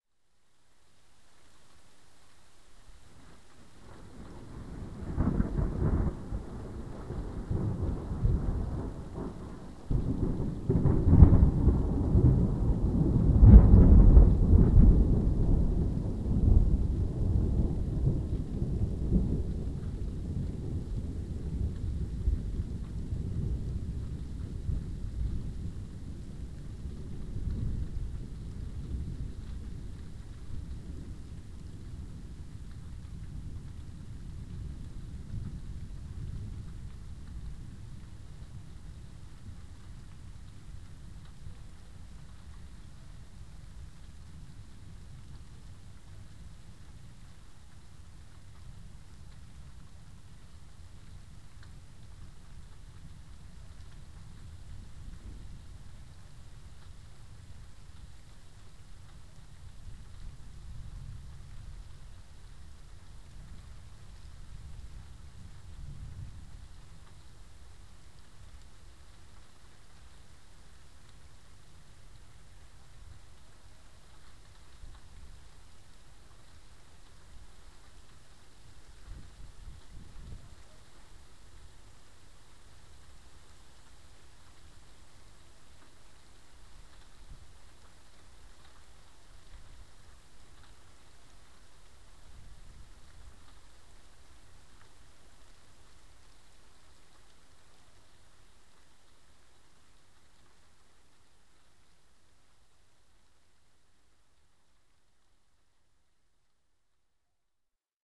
This thunder was recorded by my MP3 player in the huge squall line storm occured over Pécel, Hungary in the morning on 27th
of June, 2008.